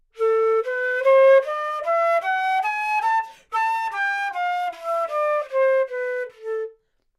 Flute - A natural minor - bad-timbre-staccato
Part of the Good-sounds dataset of monophonic instrumental sounds.
instrument::flute
note::A
good-sounds-id::7205
mode::natural minor
Intentionally played as an example of bad-timbre-staccato
neumann-U87, good-sounds, flute, Anatural, minor, scale